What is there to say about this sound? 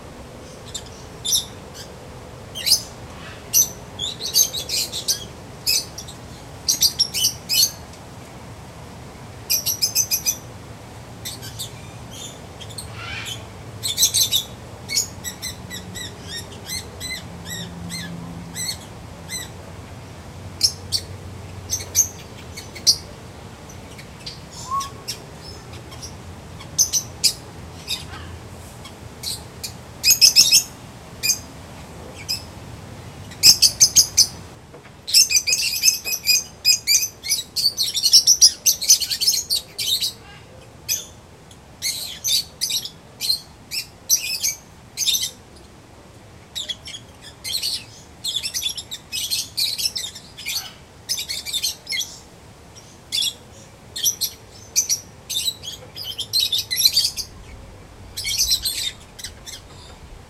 peach faced lovebird
Chirping from a group of Peach-faced Lovebirds. Recorded with a Zoom H2.
zoo, birds, field-recording, tropical, lovebird, bird, parrot, aviary, pet-store, exotic